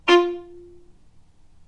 violin spiccato F3
spiccato, violin